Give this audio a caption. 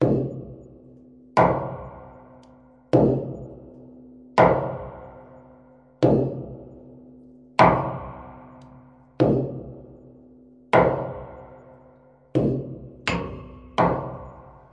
Tank of fuel oil, recorded in a castle basement in south of France by a PCM D100 Sony